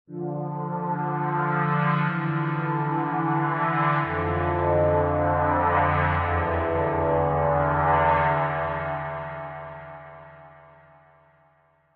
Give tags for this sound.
acid; awesome; synth

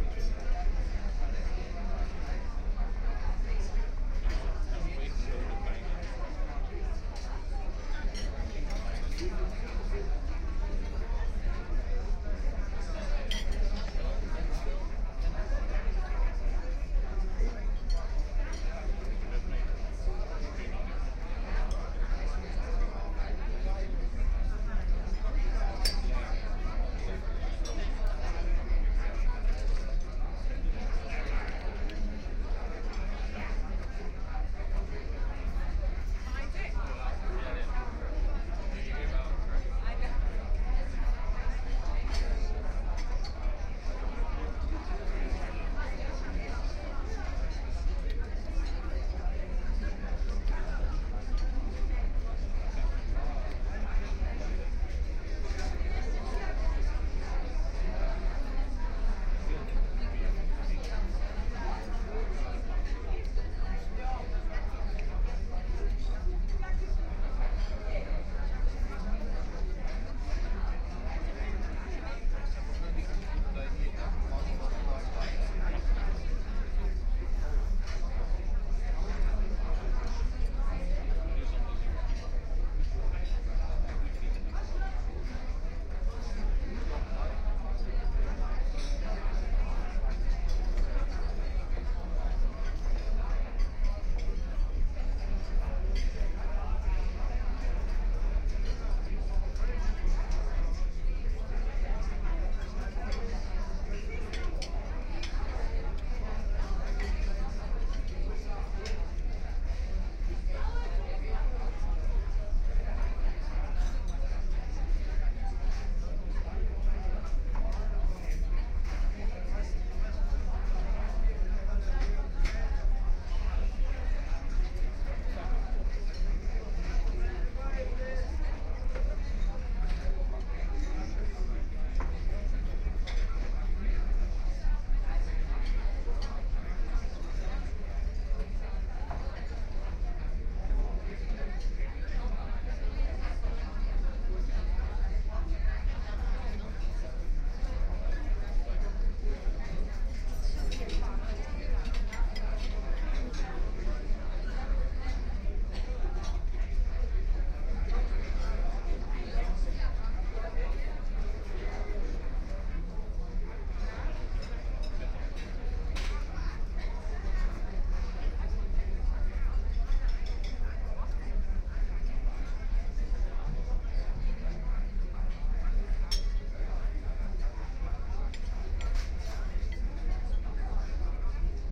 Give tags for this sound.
ship; crowd; binaural; field-recording; restaurant; ships-restaurant

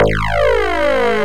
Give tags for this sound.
automation; robot; space; robotic; electronic; mechanical; machine; android; computer; droid

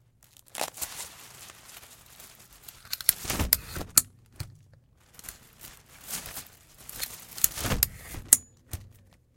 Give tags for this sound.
4maudio17; close; open; opening; uam; Umbrella

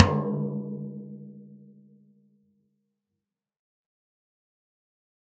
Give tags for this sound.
drum; 1-shot; velocity; multisample; tom

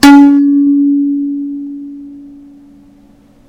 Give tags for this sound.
metallic; thumb-piano

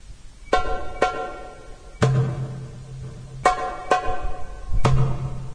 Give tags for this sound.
compmusic; moroccan; mwessa3; quddam; solo; muwassa; derbouka; andalusian; percussion; arab-andalusian

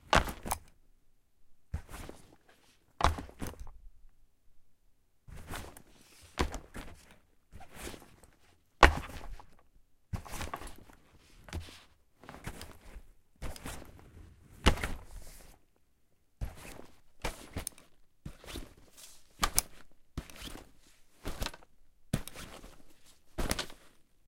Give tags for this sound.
backpack; click; pack; pick-up; put-down; stereo; thud

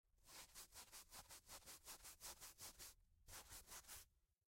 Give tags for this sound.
Brush; brushing; foley; human

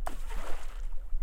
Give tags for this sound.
nature; splash; water

